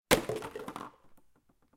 falling PET bottle 03
A small empty PET bottle falling to the floor (carpet)
Recorded with Zoom H4N
bottle, bounce, dispose, drinkingbottle, falling, garbage, impact, pet, plastic, rubbish, trash, water